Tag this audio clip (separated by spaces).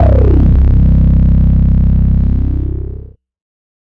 synth processed electro glitch-hop rave house hardcore sound bpm bass 909 club acid techno electronic porn-core 110 beat sub bounce dub-step noise synthesizer resonance effect 808 glitch dance trance